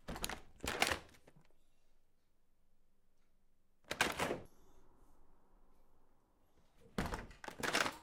House Door Open Close Interior
Interior recording of a house door being opened and closed. recorded using an Oktava MK012 and Marantz PMD661 Recorder.
interior, doors, house, door, open, shut, close, wooden, opening, closing